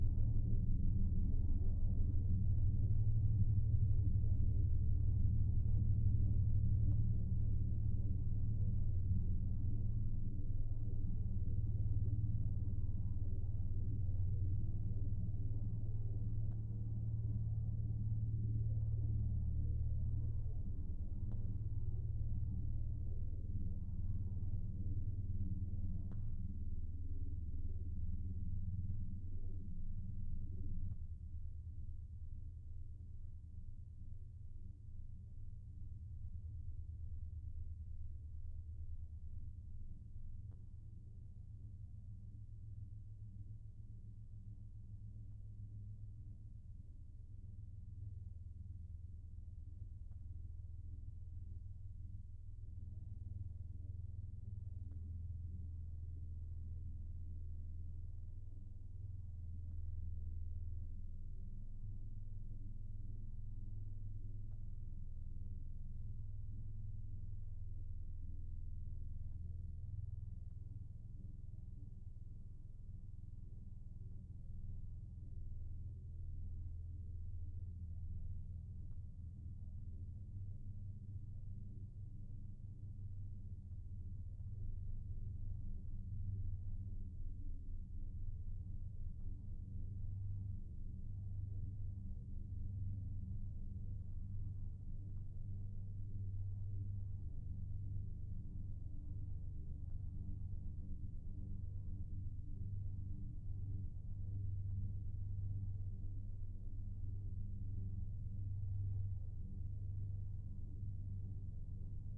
sh Starship drone 1
Developed for use as background sound/ambience for science fiction interiors. M-Audio Venom synthesizer. Cyclical rumble plus phased/bandpass-sweep white noise. Sound changes slowly over time.
science-fiction, machine, M-Audio-Venom, synthesized, ambiance